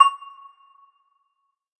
This is the second in a multisampled pack.
The chimes were synthesised then sampled over 2 octaves.
This is the note C#.